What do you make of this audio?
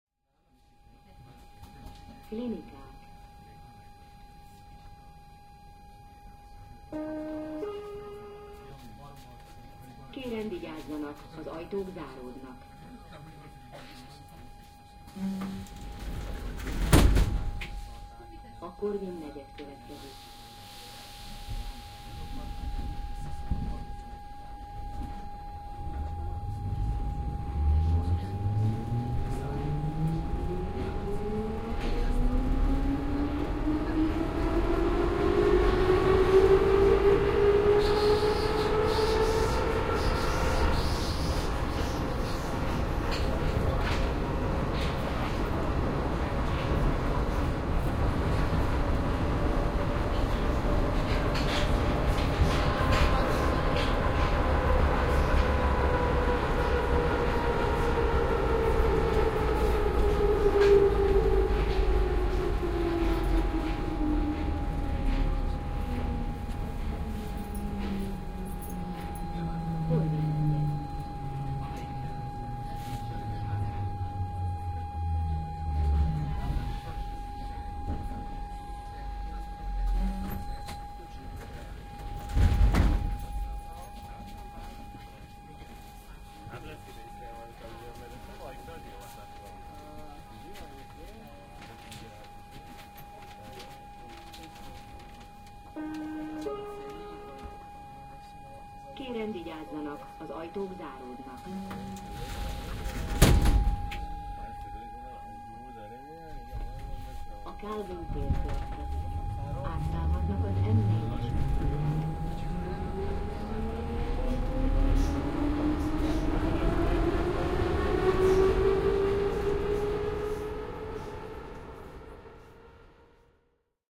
80 BI BUDAPEST Metro ride Nepliget to Centre 170120-202150
Recording of a two stations on a ride from Nepliget towards city centre in Budapest, Hungary.
Binaural recording made with Soundman and Zoom H2n